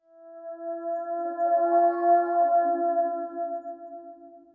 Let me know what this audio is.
discordant voices ew54b 2
Strange discordant voices. Part of my Atmospheres and Soundscapes 2 pack which consists of sounds designed for use in music projects or as backgrounds intros and soundscapes for film and games.
processed, voice, ambience, electronic, dark, cinematic, music, atmosphere, strange